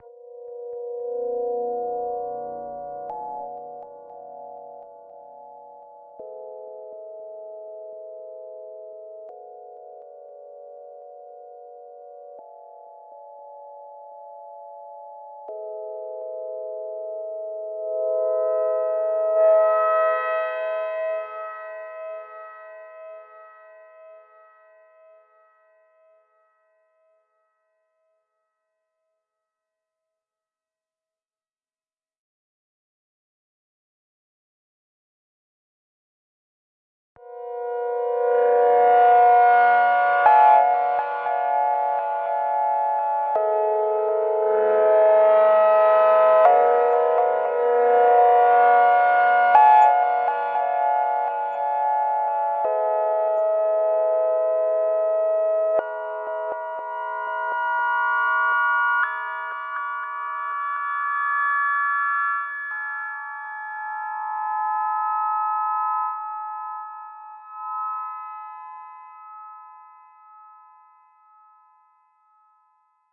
155 bpm Future - Pad shaped
Light basic Pad at 155 bpm. Created to use in a slow Drum n Bass Track but it´s went to an ambiental Scene ... Little melancholic
155, bpm, Future, Pad